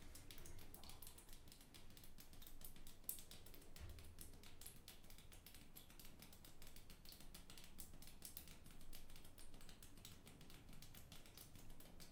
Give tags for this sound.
drip
running
shower
toilet
waterdrops

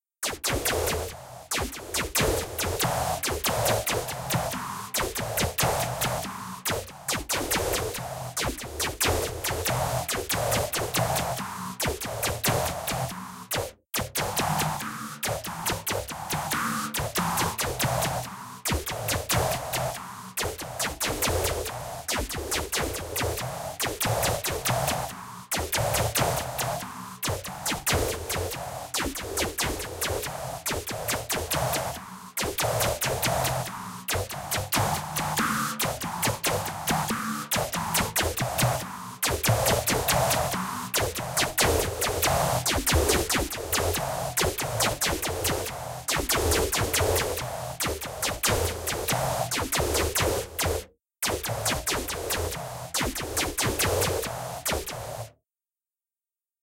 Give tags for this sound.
electronika
elektro
loop
new

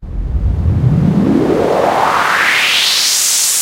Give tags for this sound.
white-noise
filter-sweep
crescendo
whoosh

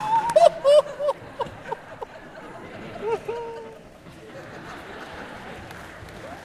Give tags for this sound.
clap
noises
audience
crowd
cackle
jeer
theater
clapping
laughter
laugh

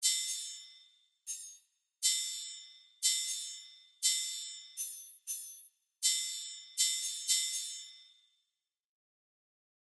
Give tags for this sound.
drums
percussion
percussion-loop

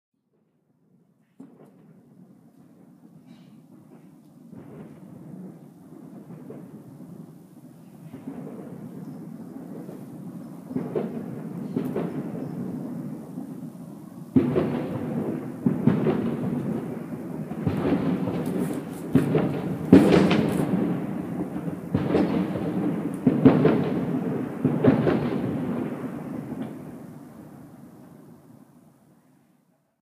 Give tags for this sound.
Fireworks
Fireworks-Crescendo
Crescendo

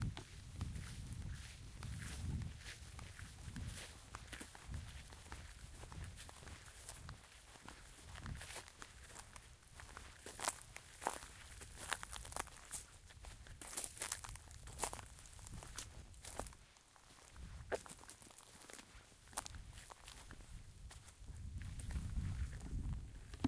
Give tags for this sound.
footsteps
ground
sand
steps
walking

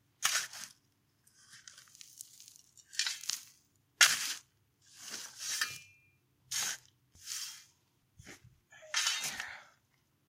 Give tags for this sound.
dig
digging
dirt
earth
ground
rock
scrape
shovel
shoveling

